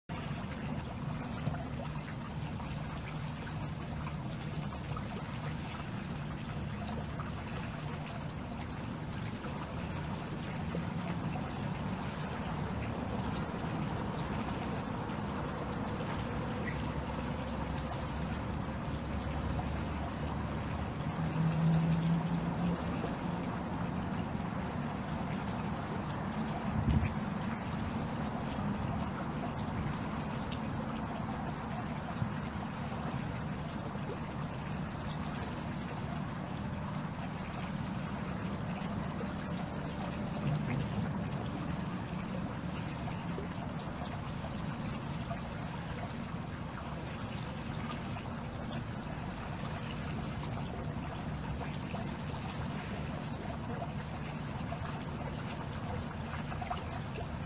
smal fontain in Vienna
flowing water from a small fountain. Recording nearly Danube in Vienna
splashing, fontain, ambient, natureoutdoor, garden, park, liquid, bllue, vienna, city, danube, water, spash, babbling, river